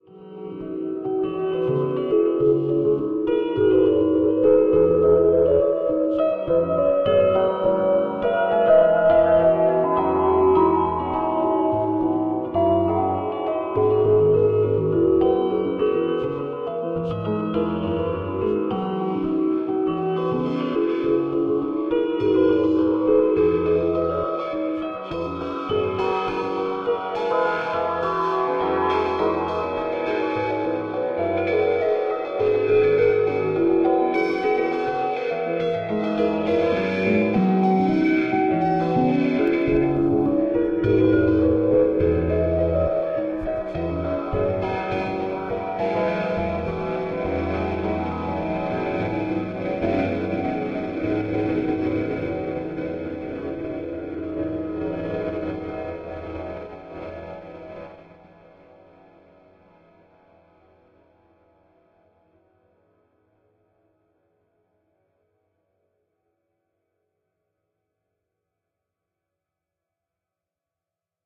Piano Ambiance 6
electronica, dance, Sample, beep, beat, bop, track, created, electronic, music, loops, song, Manipulated, sound, loop